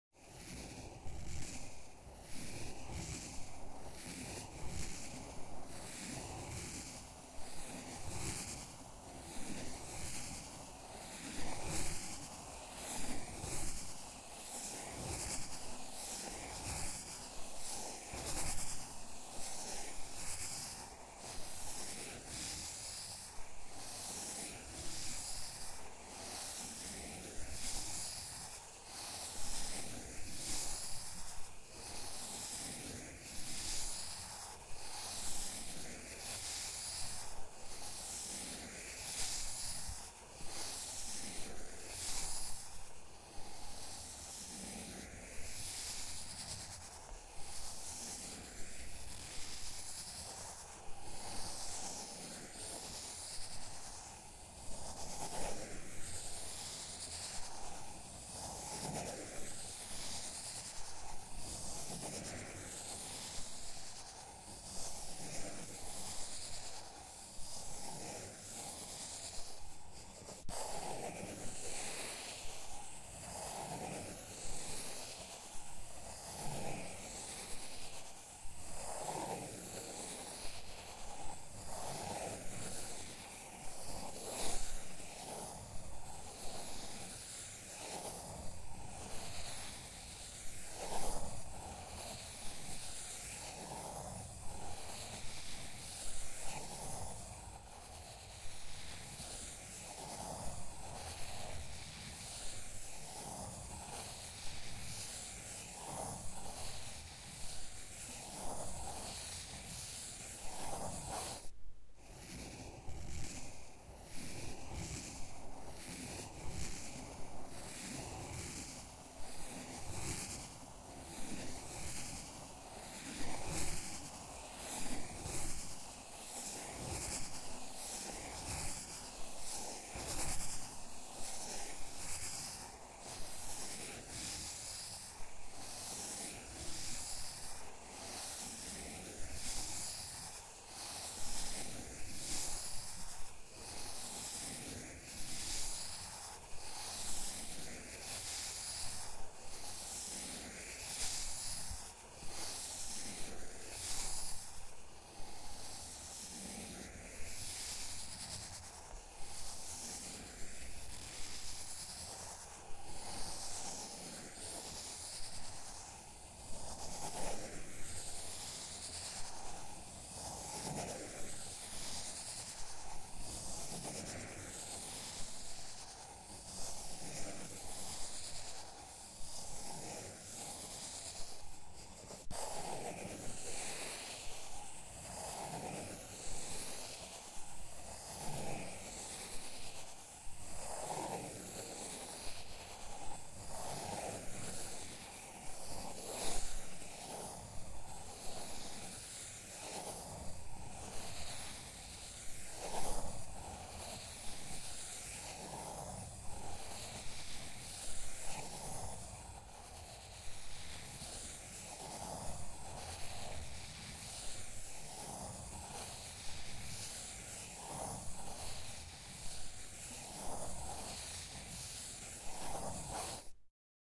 Pencil circles. Recorded with Behringer C4 and Focusrite Scarlett 2i2.